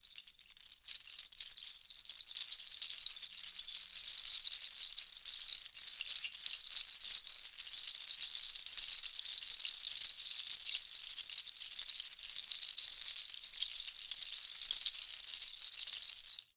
Textura mano
sonajero de pezuñas movido sobre la mano
cabra, goat, hooves, pezu, rattle, sonajero